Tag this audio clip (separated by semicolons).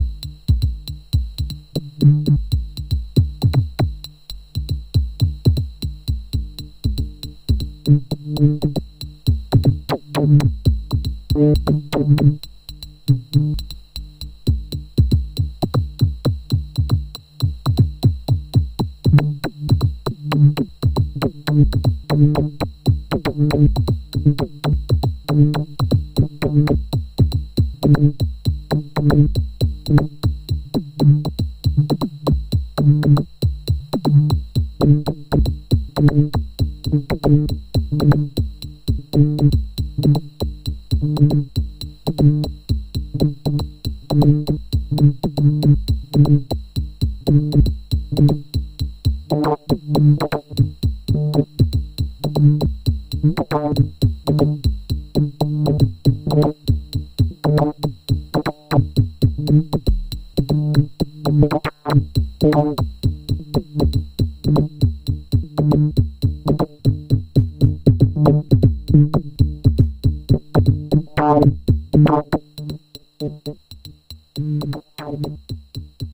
analog; kyiv; synth